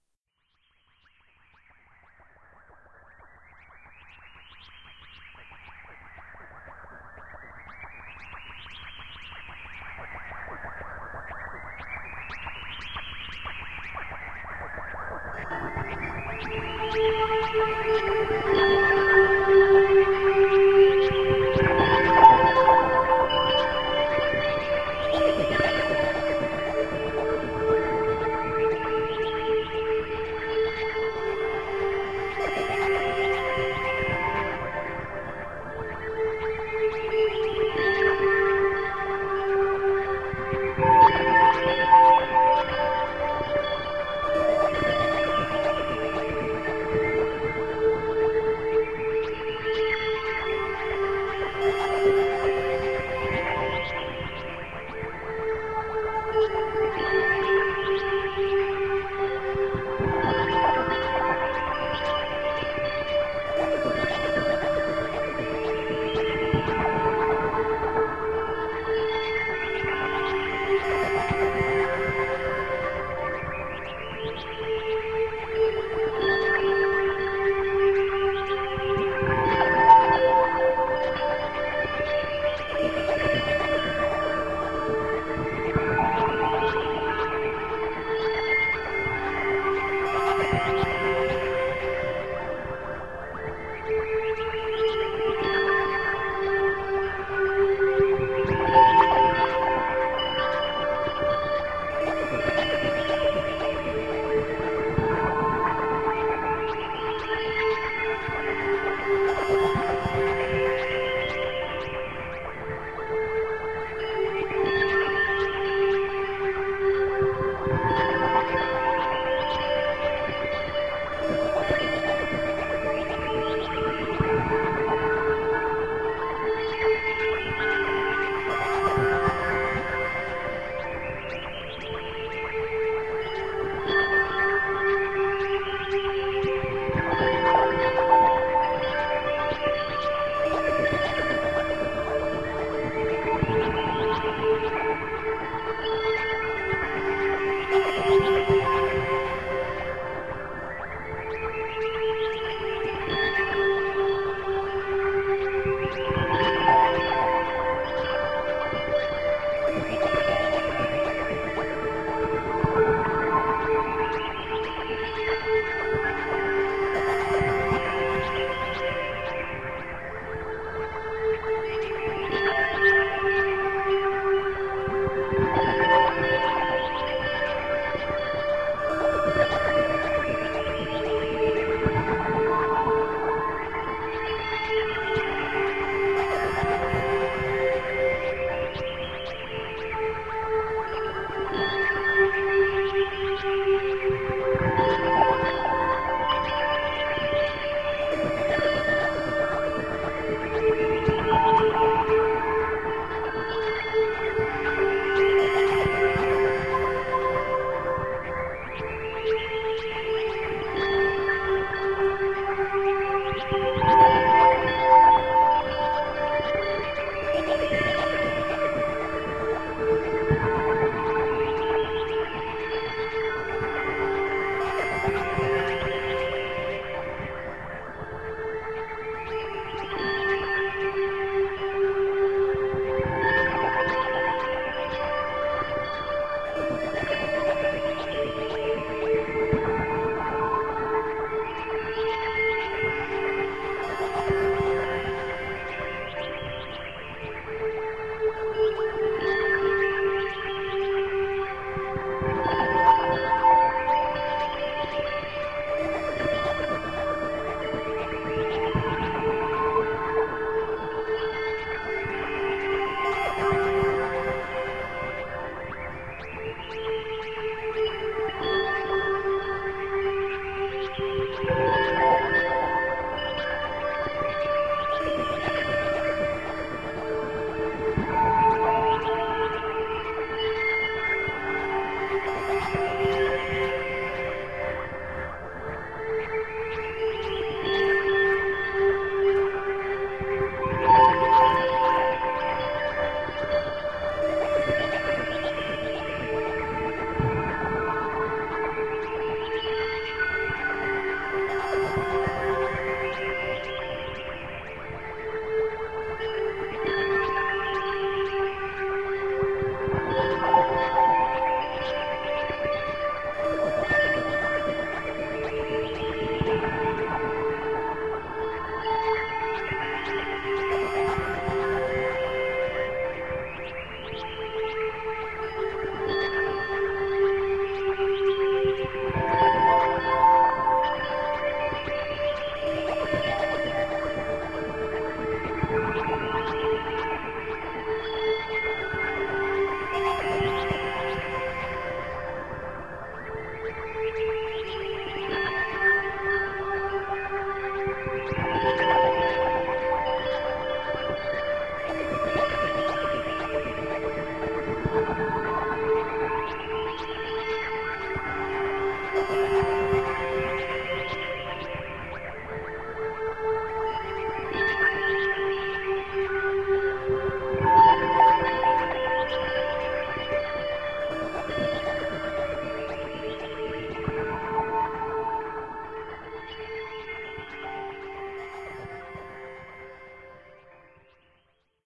Ambient Sound and Noise Scape recorded with a SONY minidisk , created with a sampler ROLAND S10 , MICROMOOG and some other noises ( mini studio )
ambient, scape, sound, noise